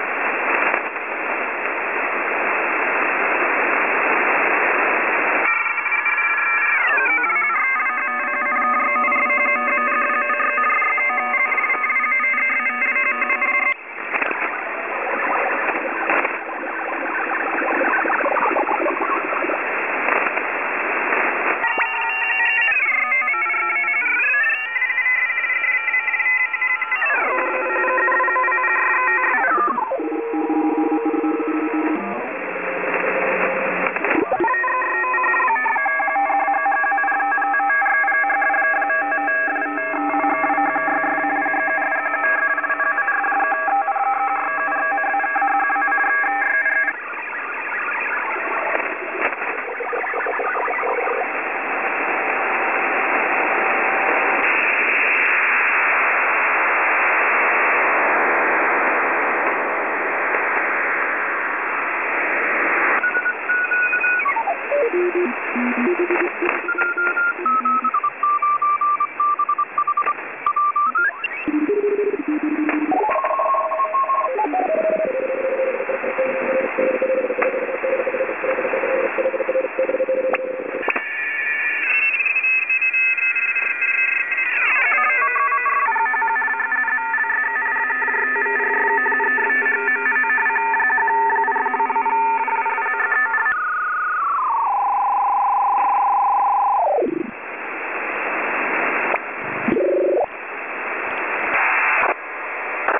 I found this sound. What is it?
Data and morse transmissions. Moving the frequency dial to create interesting sounds and effects.
Recorded from the Twente University online radio receiver.
beep, electronic, shortwave, special-effect, static